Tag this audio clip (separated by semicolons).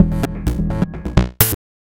minimal; loop; reaktor; loops; bleep; techno; glitch; wavetable